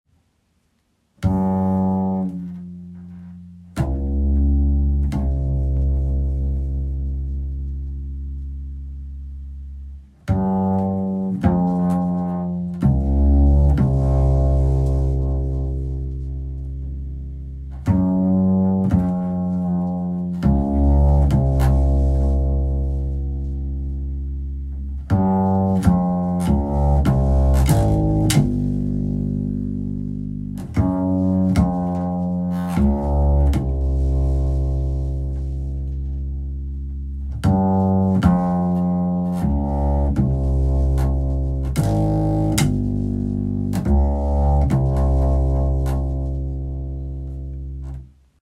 Double bass upright bass - Dark- ambient
Double bass upright bass - dark ambience madness
dark, Double, upright